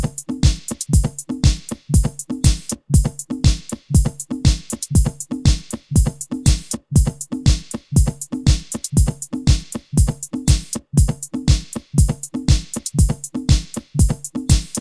Troy's disco dance club beat
dance beat w additonal hand percussion sound mixed by Troy
dance, club, beat, disco